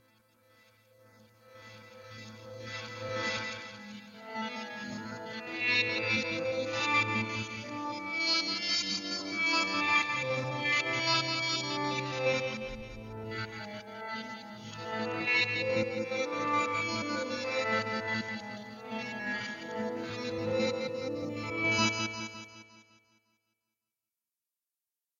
I just recorded some poor guitar with my even poorer headset directly in my laptops micinput (mini-jack) in order to fool around with audacity.